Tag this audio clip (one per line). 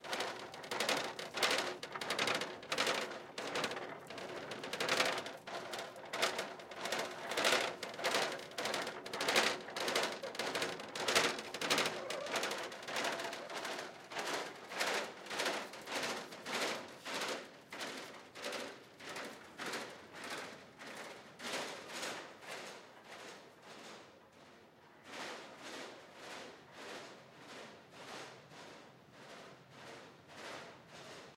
crash
hallway
hospital
rattle
trolley
wheels